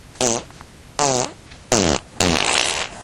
Yet another fart